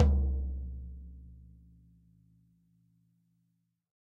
Tom3-Soft4
These are samples I have recorded in my rehearsal room/studio. It's not a fancy studio, but it's something. Each drum is recorded with an SM57 on the top head and an SM58 on the resonant head, which have been mixed together with no phase issues. These samples are unprocessed, except for the kick drum which has had a slight boost in the 80hz region for about +3db to bring out that "in your chest" bass. The samples are originally intended to be used for blending in on recorded drums, hence why there aren't so many variations of the strokes, but I guess you could also use it for pure drum programming if you settle for a not so extremely dynamic and varied drum play/feel. Enjoy these samples, and keep up the good work everyone!
instrument, studio, unprocessed, erkan, bass, sample, soft, 24, medium, bit, kick, dogantimur, tom, hard, recorded, drum, floor, snare